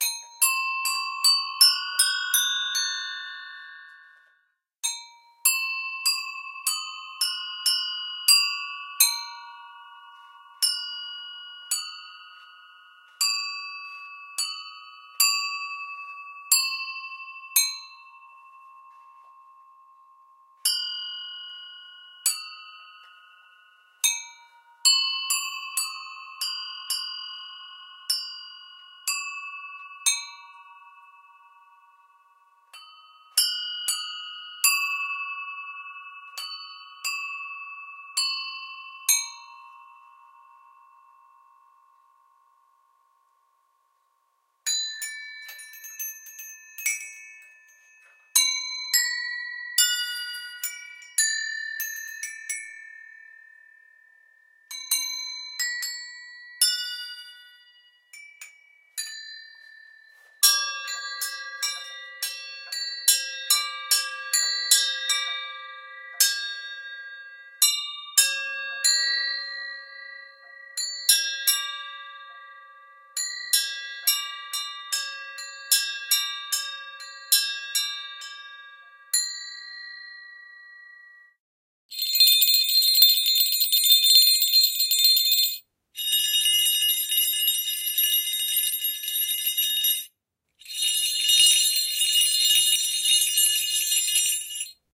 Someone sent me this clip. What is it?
Various Sounds of a glockenspiels and jingle bells made by dusan Petko from Slovakia. Recorded in his workroom. Zoom H1.
slovakia, jingle-bells